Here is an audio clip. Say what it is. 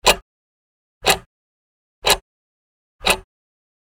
Wall clock [loop]
Small omnidirectional lavalier-microphone, a Clippy EM172, recorded with a Zoom H-5.
Edited in Audacity.
It's always nice to hear what projects you use these sounds for.
You can also check out my pond5 profile. Perhaps you find something you like there.
slow, tick-tock, short, tic-tac, time, clock, tac, tension, loop, ticking, wall-clock, ticks, bomb, clockwork, action, tic, mechanism, SFX, tick, waiting